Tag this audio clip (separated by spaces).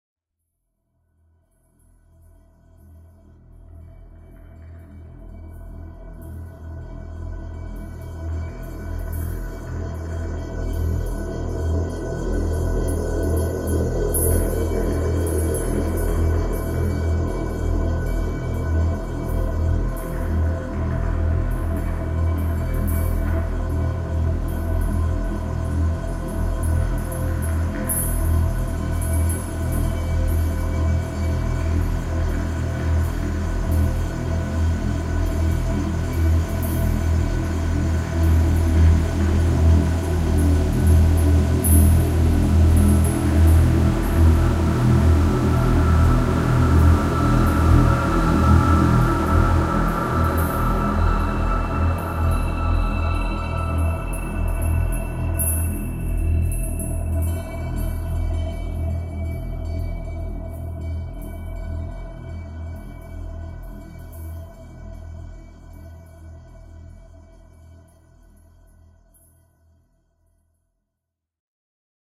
atmosphere dark